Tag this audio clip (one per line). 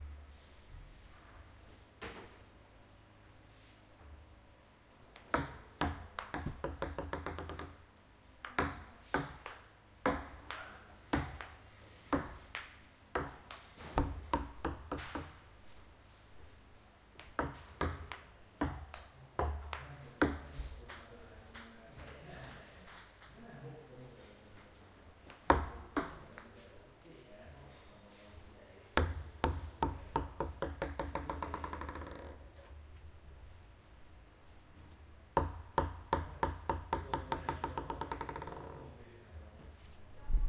ping
pong
table
tennis